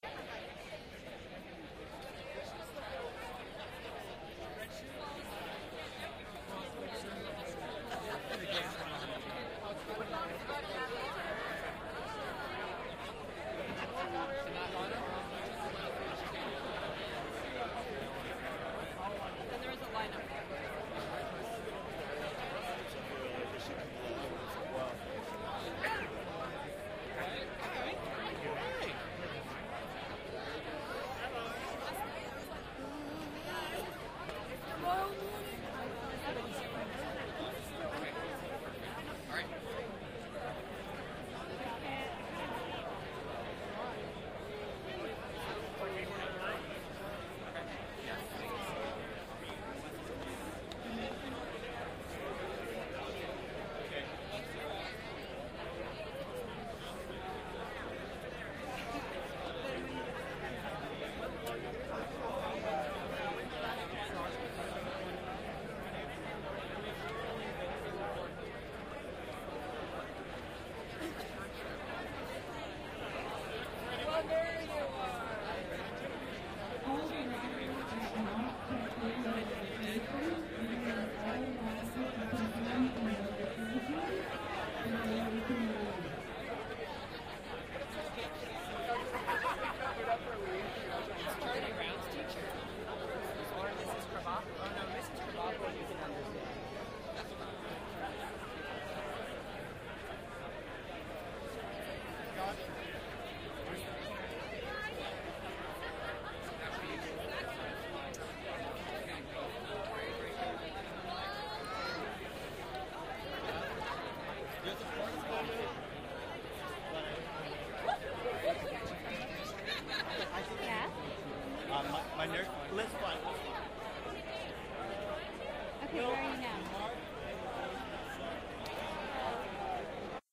Stereo binaural field recording of a large crowd talking amongst themselves.